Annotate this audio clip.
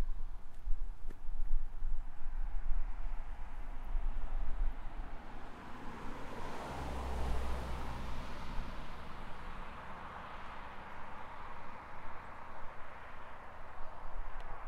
Cars driving past a parking area on Snake Pass A57 Sheffield - Manchester UK
Auto, Car, Cars, Driving, Road